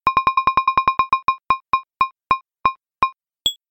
Countdown Beeps Remixed
Like a digital meter/counter slowing down, could be used for a game or points SFX etc
This sound is remixed from: youioo8
slowing, slow, down, points